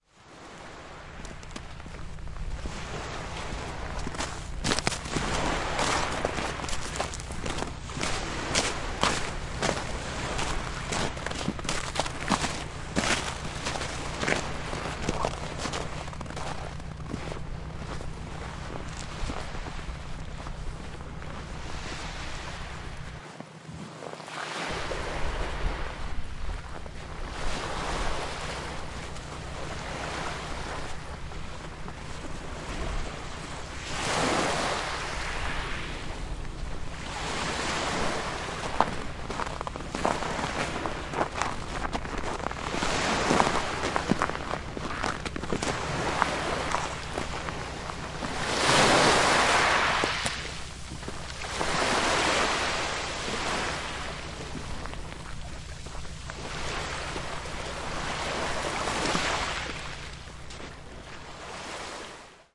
A short walk a frozen, snowy beach with gentle waves washing on the shore. In the very background is the sound of an oil tanker docked at the harbour, engines running.
beach, crunch, field-recording, frozen, snow, walk, waves